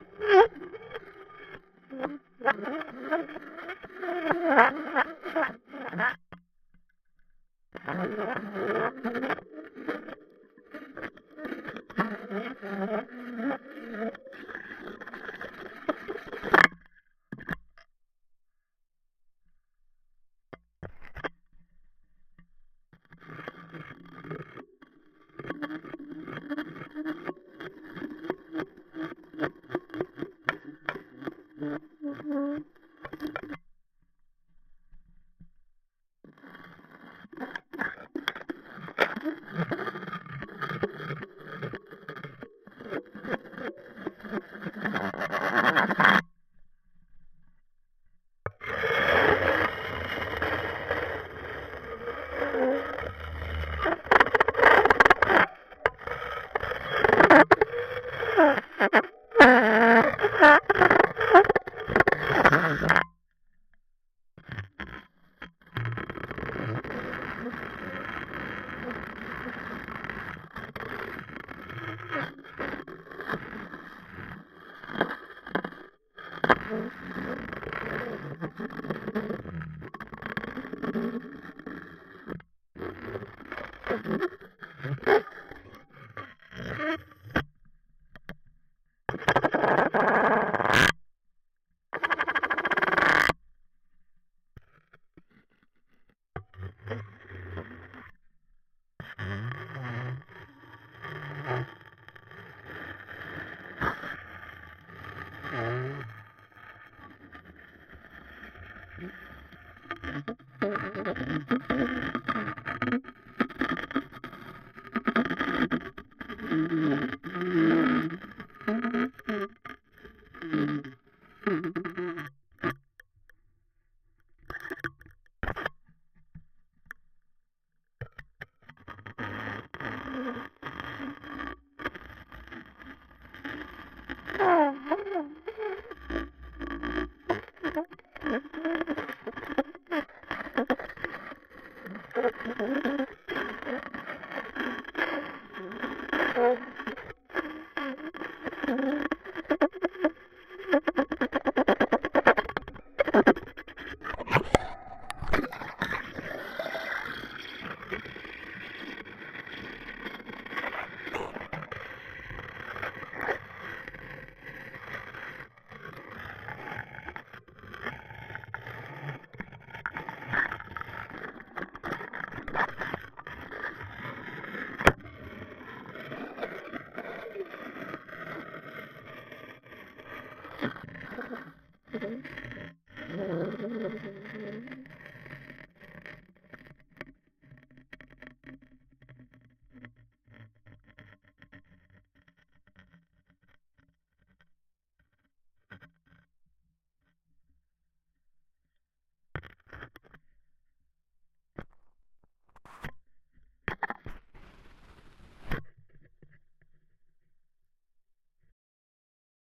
Giant Fan Scraped With Contact Mic
The sound of a homemade uncoated piezo-contact mic being rubbed against a giant fan. Sounds kind of creepy and weird, and the rubber around the edge of the mic caused it to bounce and make a bouncy kind of sound. Neat! Zoom H4N.
scrape, rubbery, piezo-contact-mic, creepy, scratch, whine, giant-fan, weird, rub